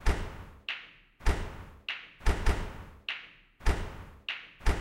a groove in 12/8 built from a slamming refrigerator sound and a cue ball hit on the pool table. at 150bpm
12, 150bpm, 8, ball, cue, fridge, refridgerator